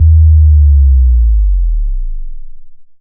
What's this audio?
ahj-subdrop-maxbass
This is a free subdrop I made for one of my tutorial videos.
base, kick, low, lowend, sample, strip, subs